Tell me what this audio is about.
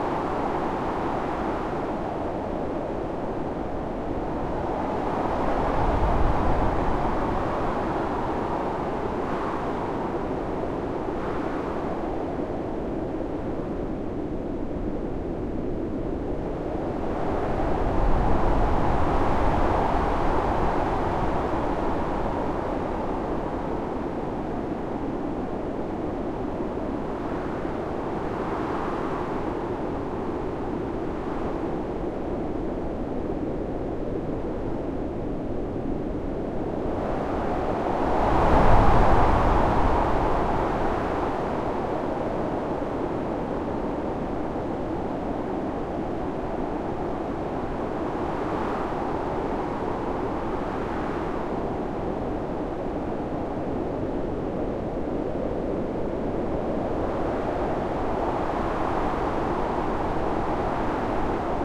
I mucked around some more with the wind sounds I made from white noise, and layered a bunch on top of each other. It sounds like a fierce snowstorm, with wind screaming past and occasional heavy gusts.
Made in Audacity on the 11/06/2020.